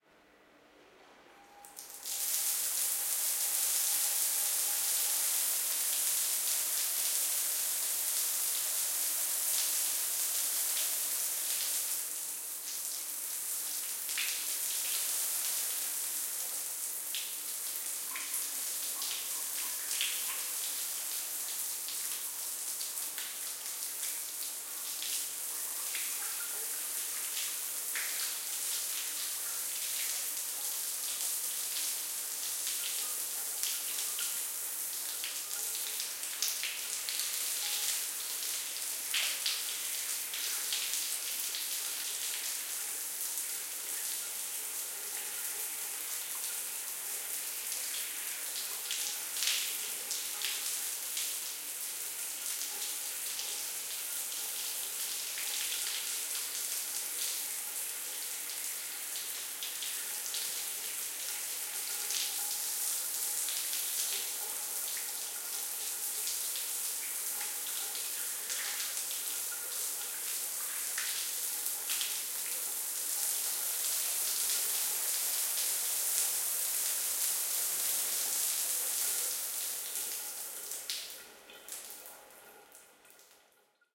WATER SHOWER 001
Someone taking a real shower with ceiling height rain shower head.